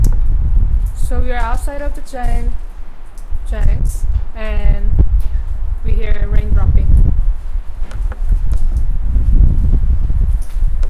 All talking about location, small bit of recording at end for raindrop.